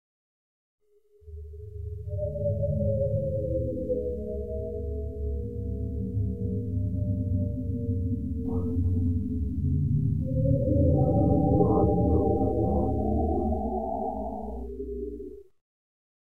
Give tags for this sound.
ambience; creepy; dark; echo; haunted; howl; mystic; spectre; speech; thrill; undead

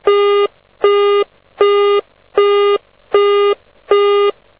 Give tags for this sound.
bt; engaged; tone

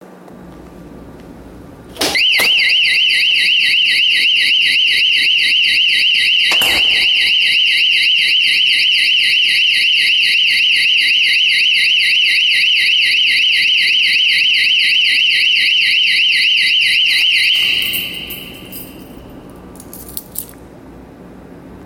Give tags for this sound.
opened; screamer; door-propped; door-alarm; door; close; Designed-Security-Inc; alarm; lenel; security; alarming; system; H2170; open; opening; door-held; alert; DSI

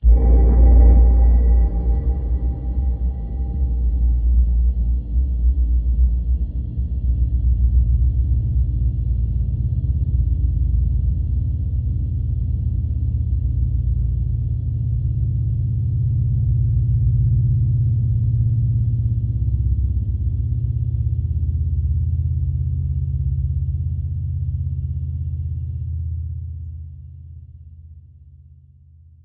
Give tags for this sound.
effect; sound; synth

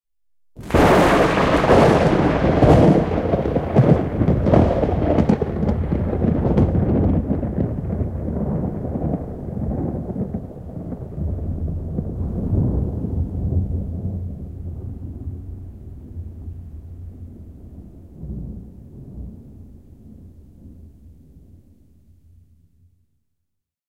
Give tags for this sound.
Yleisradio; Nature; Luonto; Thunderstorm; Ukkonen; Soundfx; Thunder; Salamanisku; Salamointi; Salama; Ukonilma; Yle; Suomi; Rumble; Boom; Finnish-Broadcasting-Company; Lightning; Finland; Tehosteet

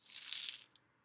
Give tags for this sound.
money; hand; coins

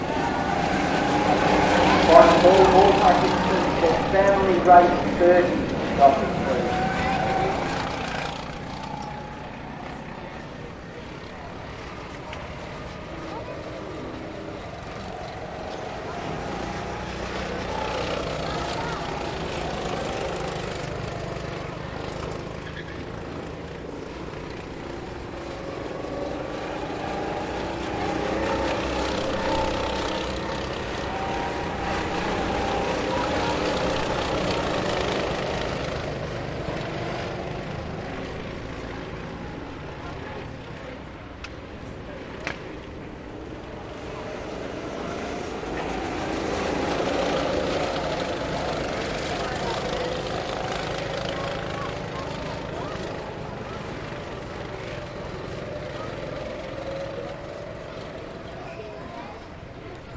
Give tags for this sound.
raceway; people; track; motorsport; crowd; gocarts

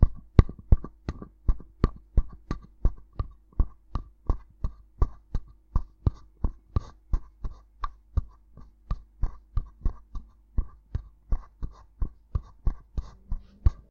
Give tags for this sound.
Walking; Walk; Steps; Footsteps

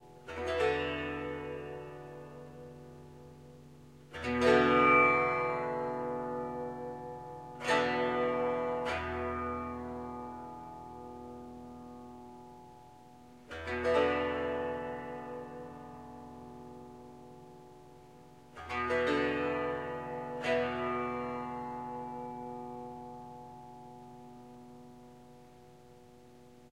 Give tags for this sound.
bass; tanpuri

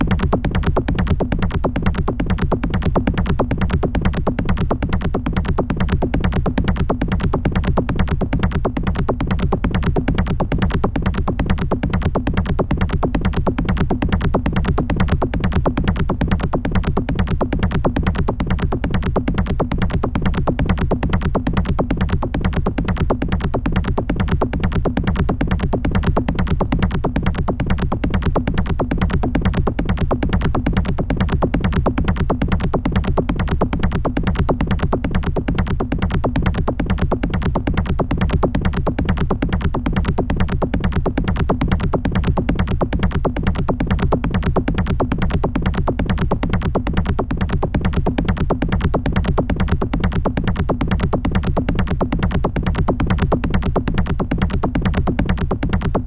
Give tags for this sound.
techno; loop

